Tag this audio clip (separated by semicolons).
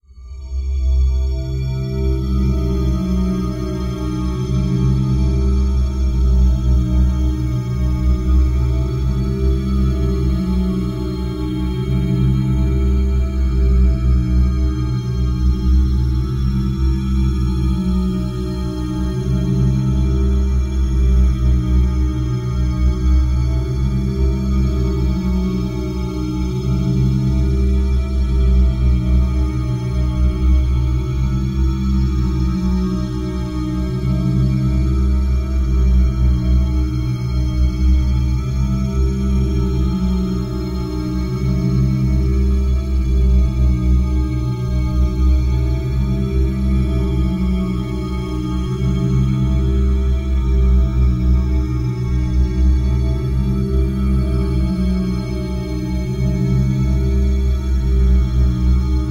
ambient; bass; creepy; loop; scientifically; spooky